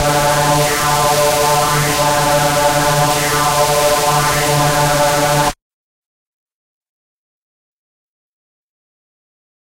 multisampled Reese made with Massive+Cyanphase Vdist+various other stuff
distorted, hard, processed, reese